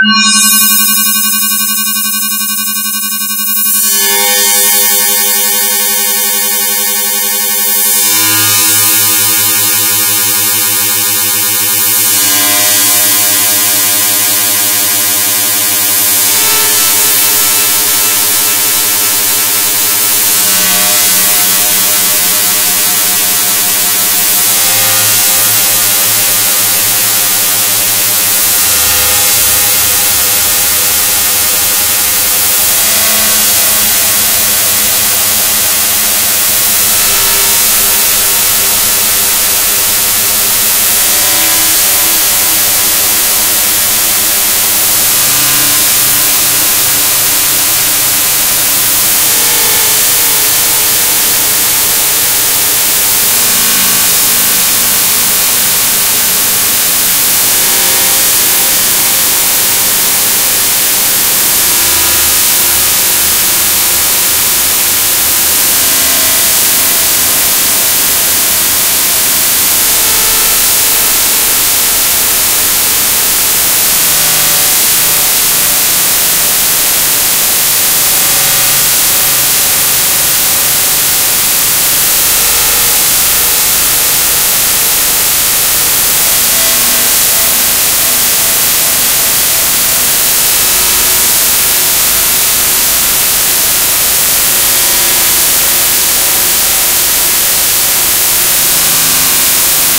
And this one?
The thing, which went wrong before, MIGHT be correct now, but I'm absolutely unsure.
it seems that, due to it goes faster than the sound it emits, the sound interferes with itself, making it noisier and noisier the longer the sound is emitted.
The whole thing sounds like an alien accord, featuring binaural beats (use Headphones).
This is (hopefully correctly) calculated, by using the Doppler effect and I even kept in mind the amplitude change, due to getting closer and more distant to the mics (ears) :)
beat, sine